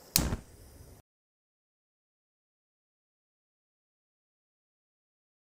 Gas Stove Turn On 5
Gas stove clicking fire burner
burner, clicking, fire, gas, stove